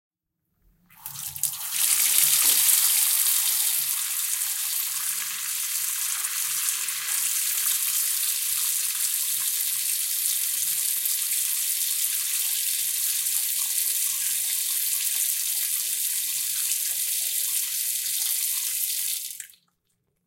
Running water from a restroom`s faucet.
bathroom, restroom, running-water, sink, water, faucet